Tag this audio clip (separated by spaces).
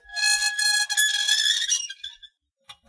hinge squeaky rust squeak painful creak rusty binaural metal